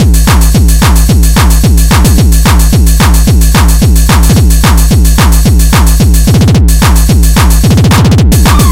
i made this using the default sounds from hammerhead music station. just loop this file to get a nice hardcore background loop. i want feedback on my crappy music loops.
220-bpm, hardcore, speedcore, hard